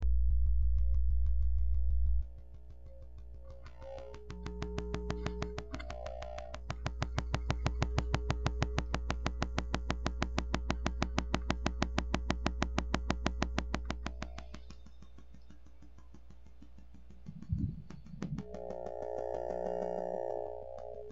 recording of mysterious mic noise